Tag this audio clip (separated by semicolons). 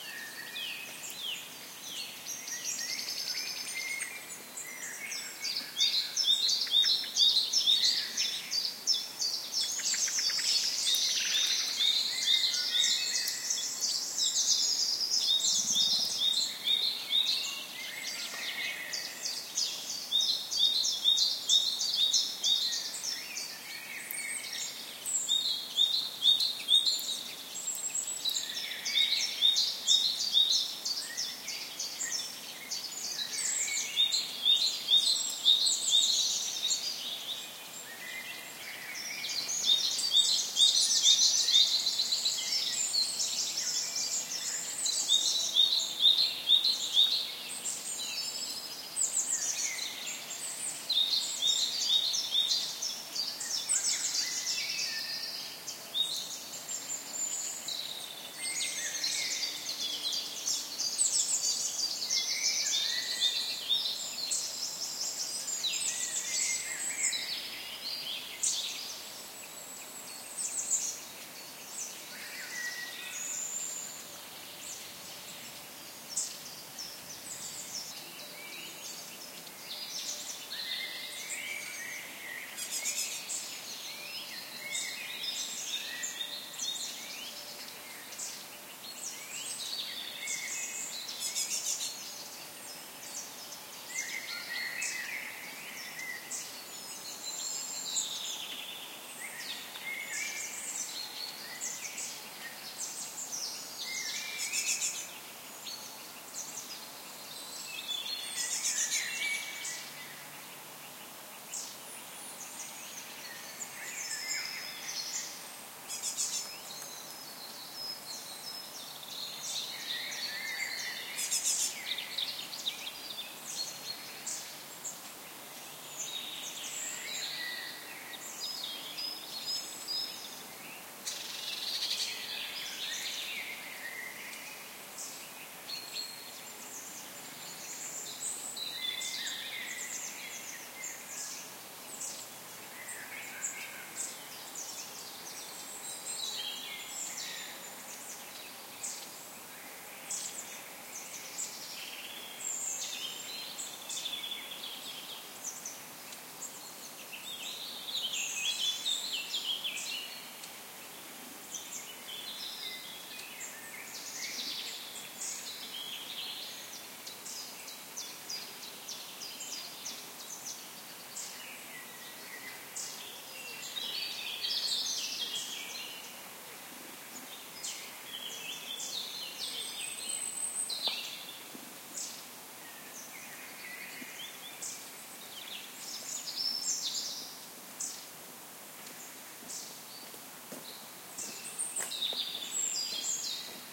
ambiance; field-recording; ambient; birdsong; bird; birds; ambience; nature; spring